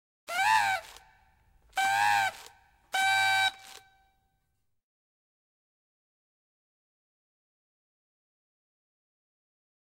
07 Party Whistles--3
sound of whistle in birthday or party
Whistles, party